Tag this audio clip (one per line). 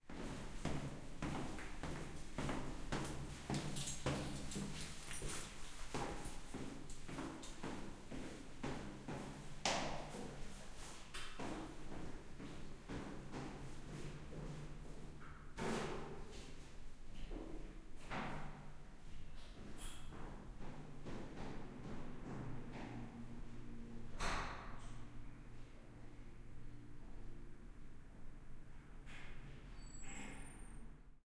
walk
woman
wood
Switzerland
foot
keys
staircase
steps
door
floor
stairway
old
stair
footsteps
walking
house
stairs
open
feet